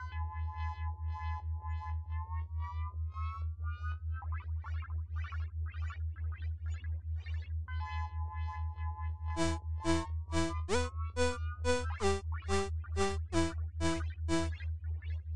A simple sample ideal for an intro or the background of a menu selection of some kind.This was created from scratch by myself using psycle software and a big thanks to their team.